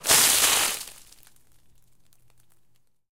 water - ice - cooler - dumping a cooler full of ice off of a second story railing 01
Dumping a cooler full of ice cubes off of a second story railing onto grass.
dumped, dumping, grass, percussive, water, splash, ice-cubes, impact, crash, wet